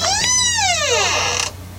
a creaking door recorded with a condenser mic. sounds normalized in ReZound.